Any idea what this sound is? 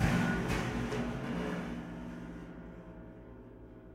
knock over piano2
Piano being knocked over.
being, over, Piano